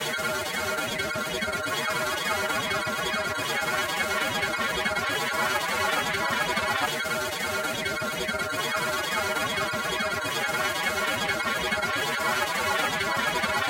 YEET (Sped)
I pushed the tempo of a bunch of stuff in Ampify as high up as they could go, then sped it up and took the pitch up two octaves. This was the result.
electronic, experimental, extratone, flex, laser, sci-fi, sound-enigma, space-war